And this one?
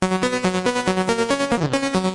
A lead part created using Access Virus C and third partie effects.